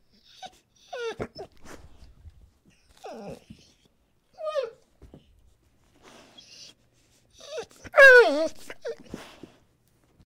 Dog Whimper 1
Medium-sized dog whimpering.
dog, pet, sad, whimpering